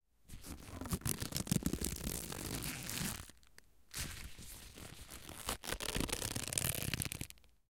Stretching an exercise band.